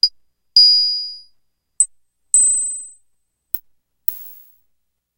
metallic synth cymbals
analog, poly